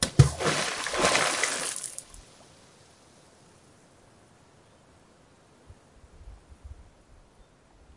Tossing rocks into a high mountain lake.
bloop, splash, water